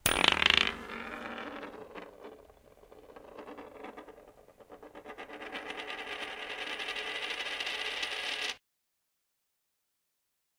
JK Coin Spinning
A coin spinning.
coin, money, spin